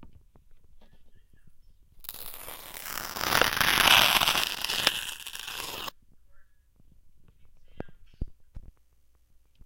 Recorded on a ZOOM Digital H4N recorder with a hand made crystal microphone attached. This is the sound of a piece of paper being ripped in half.
COM371, class